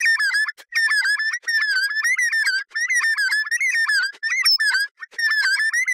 I made this sound in a freeware VSTI(called fauna), and applied a little reverb.
animals, alien, creature, space, critter, synthesized, animal, synth